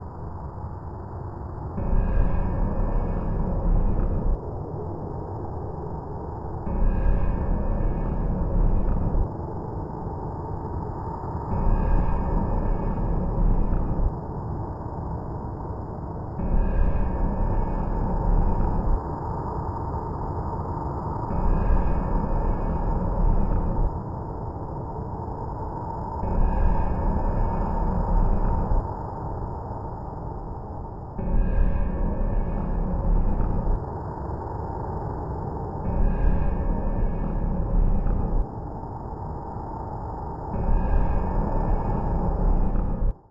DABEL Jérémy 2016 2017 submarine
A submarine in the depths of the ocean.
I used the record of a metallic sound where someone beats a metallic radiator constantly. I applied the Paulstrech effect, I high pitched it and I added some reverb to imitate the clunking sound of the ocean’s depths. I used also the sound of one beat on a metallic surface, I applied on it the Wahwah effect, the Paulstrech effect, I added some reverb and high pitched it too. I duplicated it to make it repeat at some constant times in case to reproduced a submarine’s sonar like sound.
• Typologie (Cf. Pierre Schaeffer) : continu complexe (X) avec des impulsions complexes (X’)
• Morphologie (Cf. Pierre Schaeffer) :
1. Masse : son cannelés
2. Timbre harmonique : strident
3. Grain : rugueux
4. Allure : sans vibrato
5. Dynamique : douce
6. Profil mélodique : serpentine
7. Profil de masse : site, différentes variations